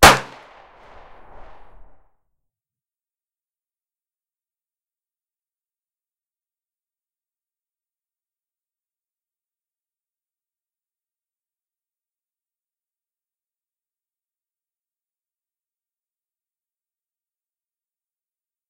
.32 Cal Pistol - Cleaned Up
That effect had the sound of casings dropping in the background.
32, caliber, firearm, gun, gun-shot, outdoor, pistol, range, shots